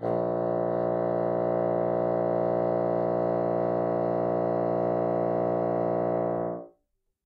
One-shot from Versilian Studios Chamber Orchestra 2: Community Edition sampling project.
Instrument family: Woodwinds
Instrument: Bassoon
Articulation: sustain
Note: A#1
Midi note: 34
Midi velocity (center): 95
Microphone: 2x Rode NT1-A
Performer: P. Sauter